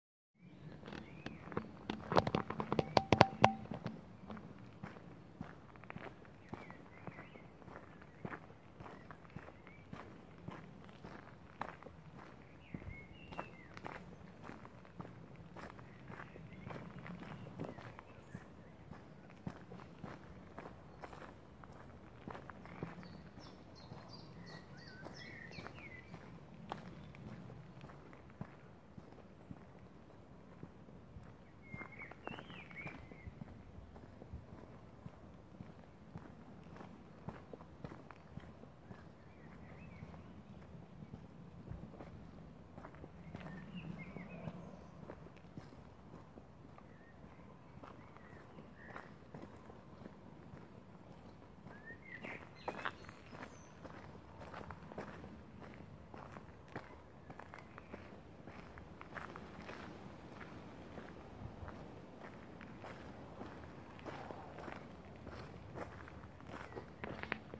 Gravelroad and birds singing (02-07-2016)
This is me walking down a gravelroad in the forests of Utrecht on a summerday in July. You can hear blackbirds singing.